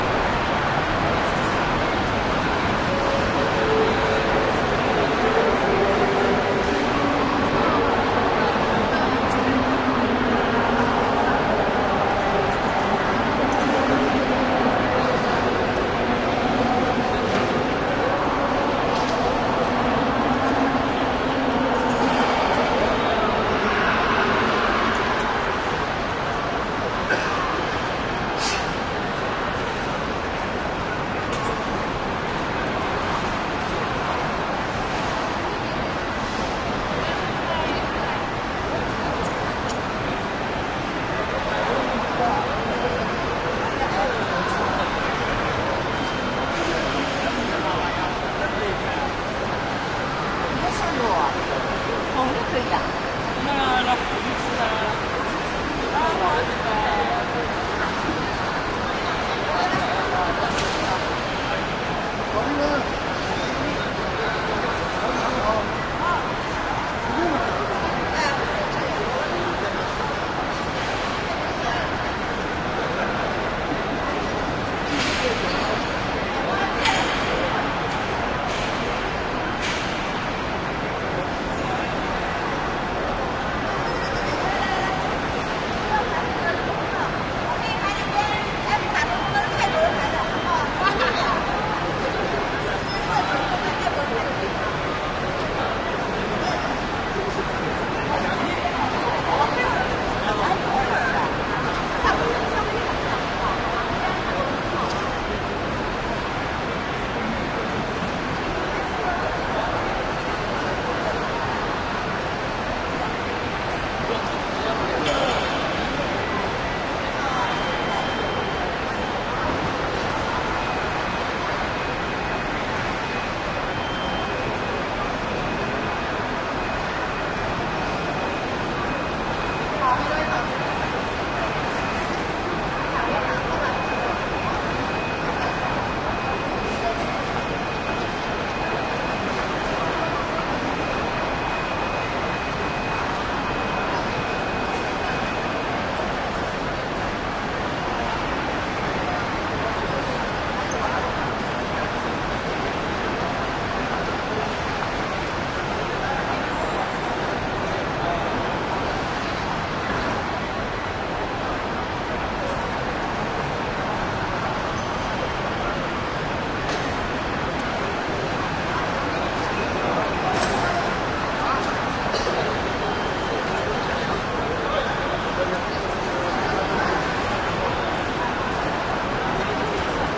Thailand Bangkok airport check in area huge wash of voices and activity
wash, check-in, field-recording, Thailand